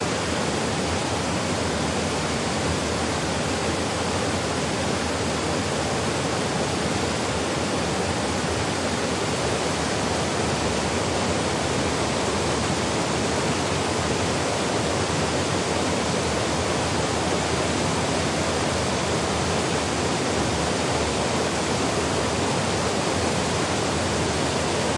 Waterfalls in Italy.
Recorded with Tascam DR - 07X.
Slightly EQed on the High Frequencies to make it sound more cleaner.